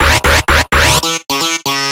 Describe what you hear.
Just a little Dub Step Loop/Drop. Made from Native Instruments Synths.
Hear this Sound in a Track!
Bad, Big, Cloud, Drop, Dub, Electronic, HD, High, Instruments, Intense, Is, Life, Loop, Massive, Native, New, Real, Song, Sound, Step, This, Wub